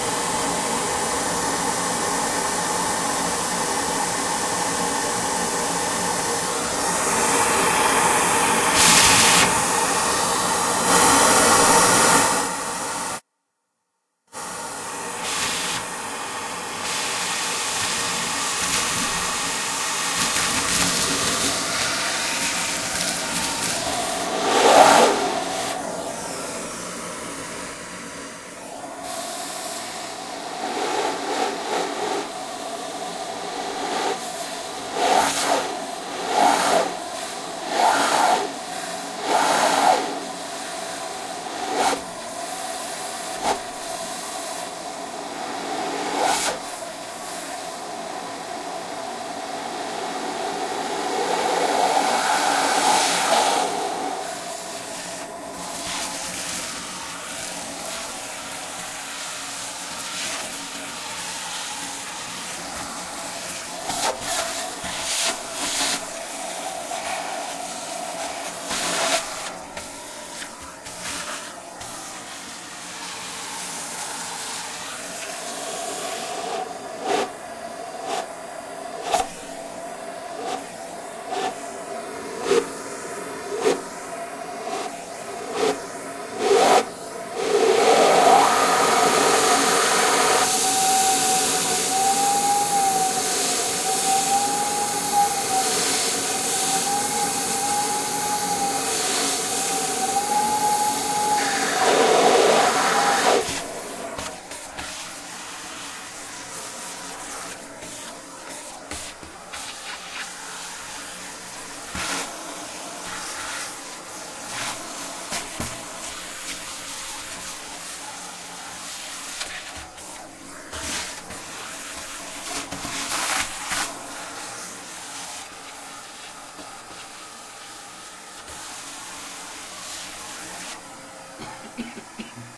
Some sound effects with a vacuum cleaner. Useful for sound design like swooshes.